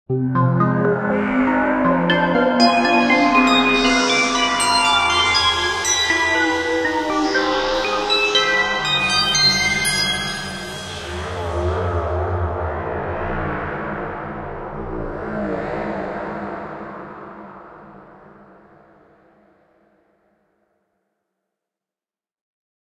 eventsounds3 - intros b
I made these sounds in the freeware midi composing studio nanostudio you should try nanostudio and i used ocenaudio for additional editing also freeware
intros, intro, sound, effect, game, clicks, desktop, click, blip, sfx, application, startup, event, bootup, bleep